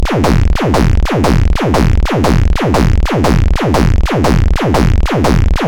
make with reaktor block: west coast modules